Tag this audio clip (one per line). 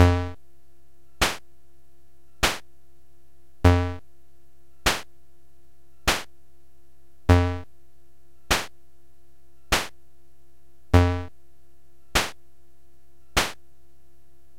90
90bpm
beat
cheap
drums
electronic
keyboard
loop
machine
slow
toy
waltz